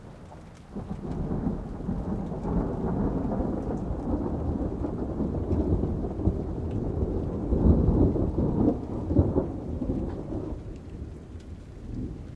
ThunderSound (3), recorded with my Blue Yeti Microphone.
ThunderSound, Scary, Thunder, Horror, Thriller, Sound, Heavy, Loopable